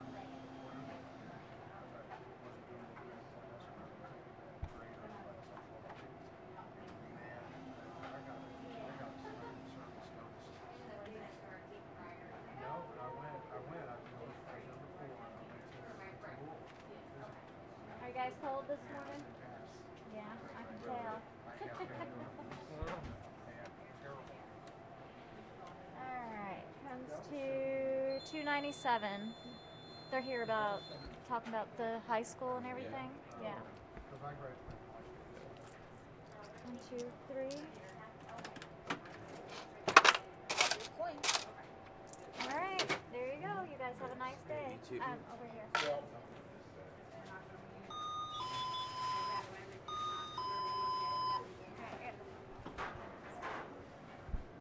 Small country store ambiance. Sennheiser shotgun, Tascam 60d.
coins, register, store